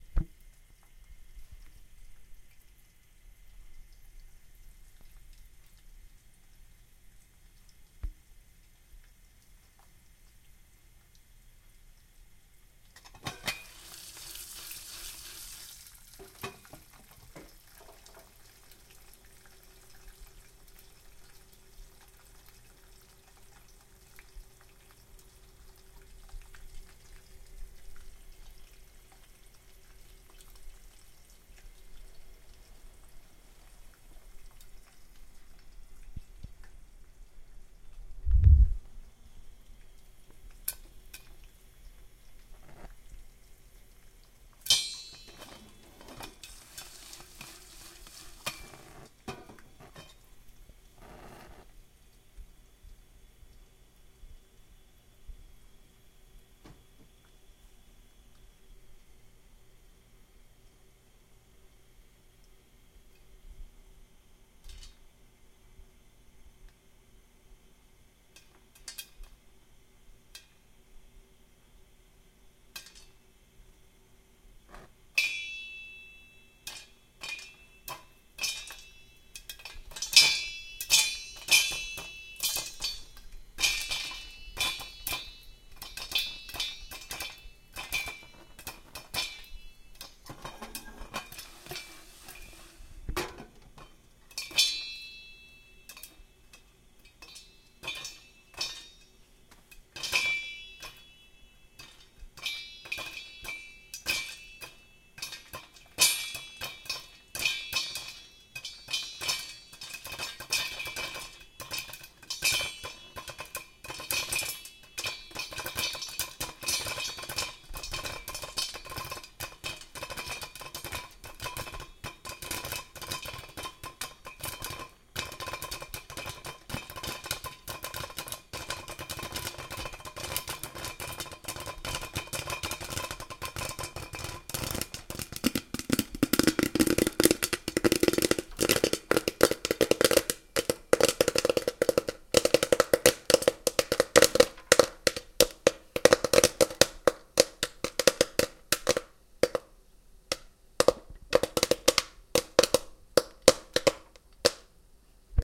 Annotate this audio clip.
a close up recording of popcorn popping in a metal pot on an open burner
close-up, loud, metal, open, popcorn, popping, pot, stove-top